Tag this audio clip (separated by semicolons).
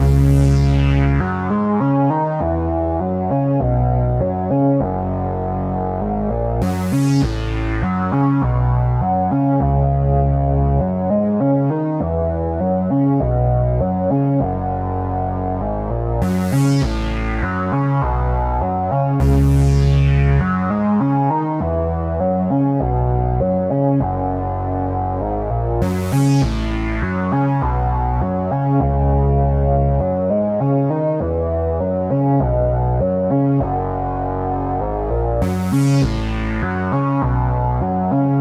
base; loop